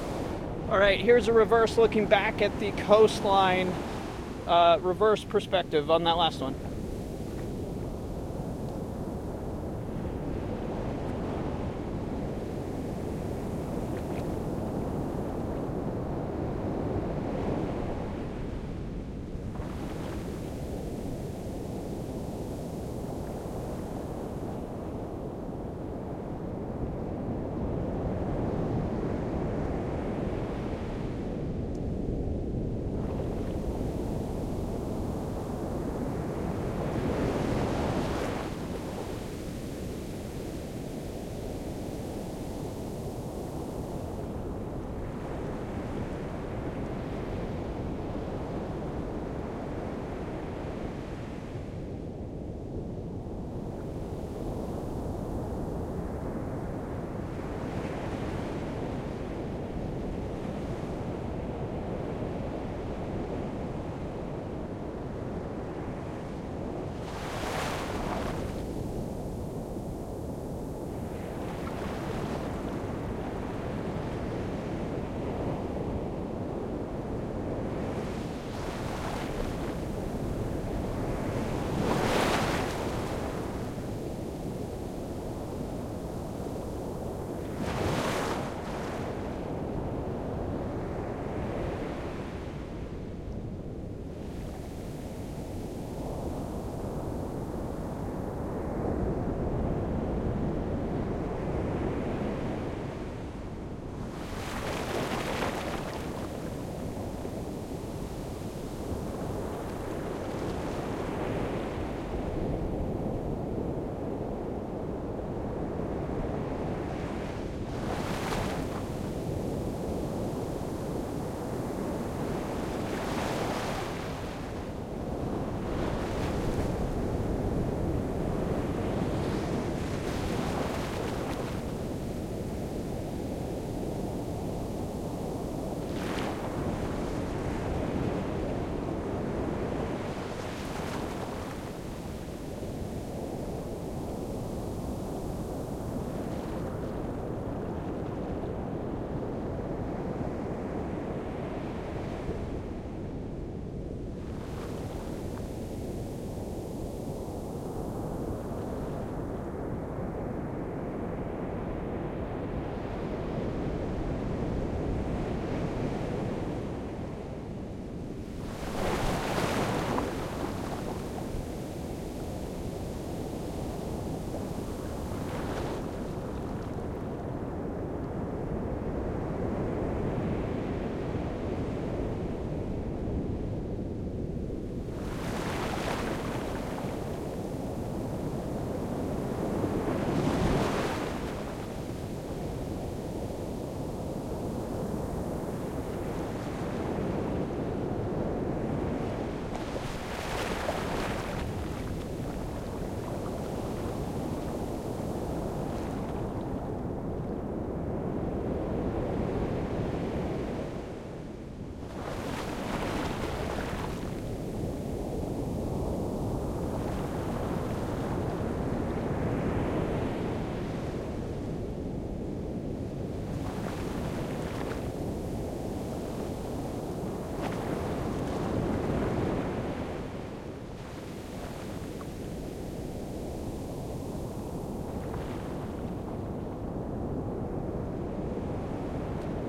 Nighttime in the ocean of Manhattan Beach. Center channel is pointed to the east (ocean behind, beach in front), microphone is inches off the water. Waves break in the Ls / Rs, then roll through to the LCR and the sea foam fizzes. You’ll hear the water breaking around my calves in the LCR.
Credit Title: Sound Effects Recordist
Microphone: DPA 5100
Recorder: Zaxcom DEVA V
Channel Configuration (Film): L, C, R, Ls, Rs, LFE